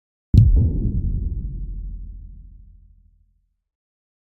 A heavy, low pitched "thump", processed in Logic using instruments, compressors and space designer.
bang, bass, boom, deep, dr, explosion, kick, low, nn, pitch, thump